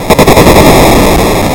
All these sounds were synthesized out of white noise being put through many, many plugins and filters.
noise, silence, minimal, fx, hi-fi, minimalistic, experimental